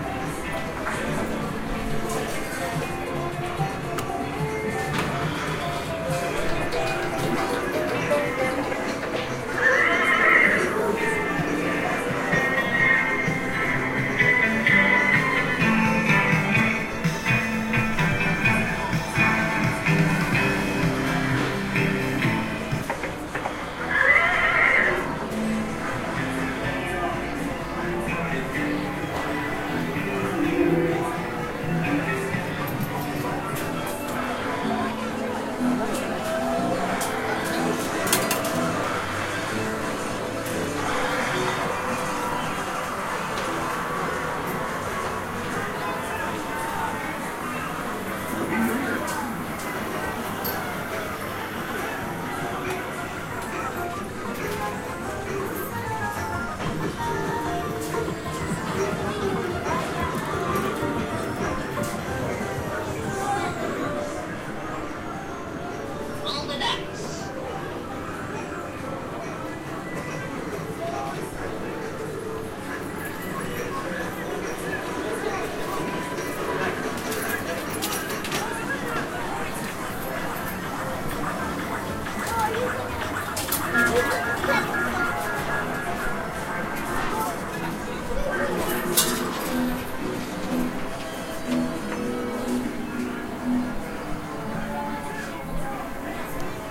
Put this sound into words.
Walking through a games arcade in Margate in late September
arcade, Field-Recording, games, margate, seaside, Stereo